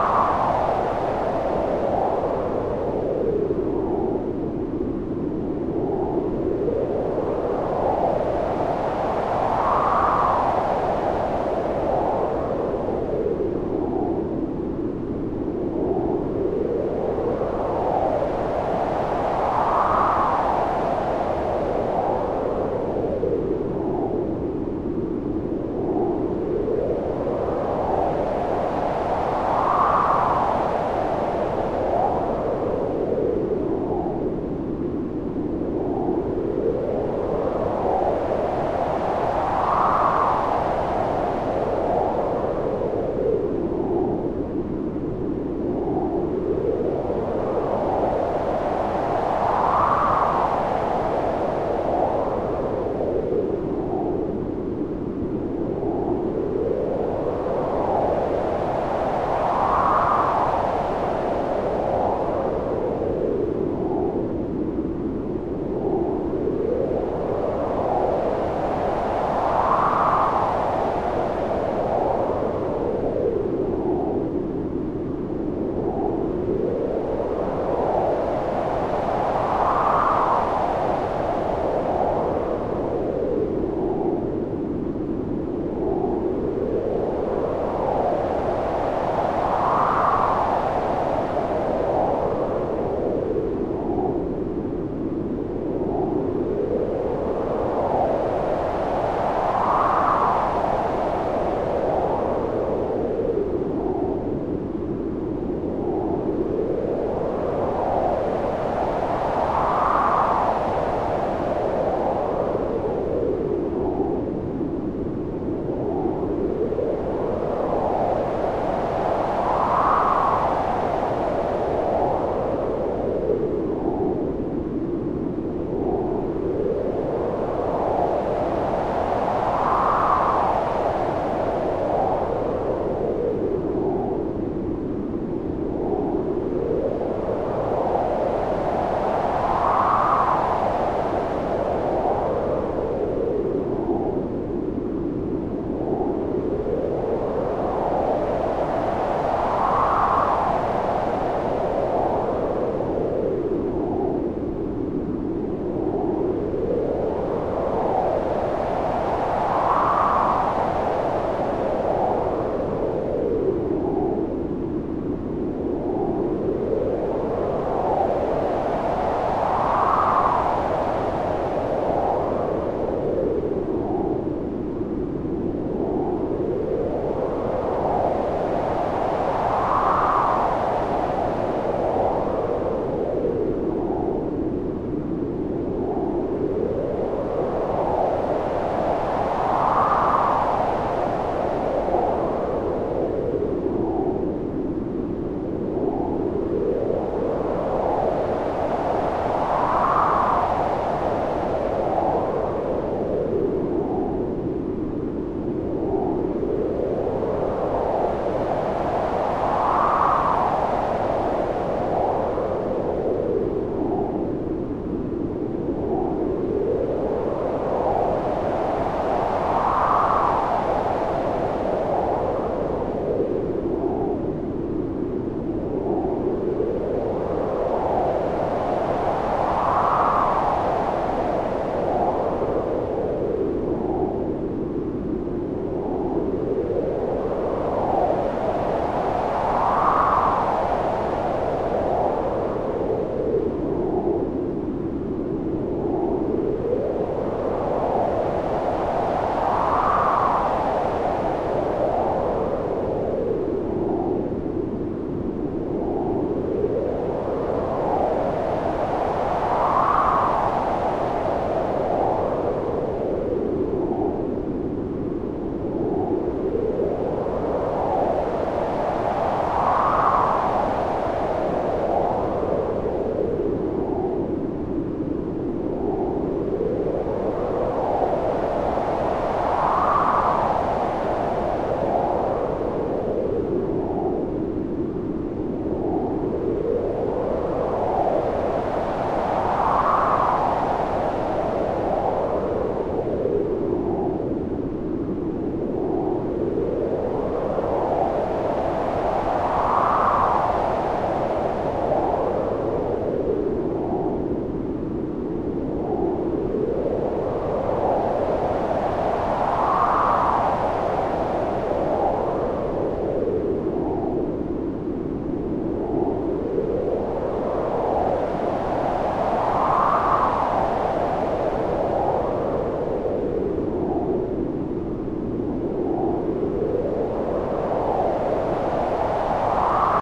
Wind (Artificial)
Artificial wind created with Audacity.
noisy-wind, wind, wind-noise